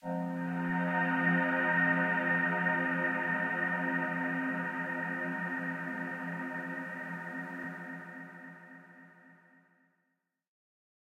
Glowing Pad
A, Acoustic, Bamboo, Bass, C, Chords, D, dare-9, E, Electric, Finger, G, Guitar, High, Hit, Hollow, Low, Melody, Metal, Packaging, Paper, Power, Quality, Record, Recording, Roll, String, Tube, Wood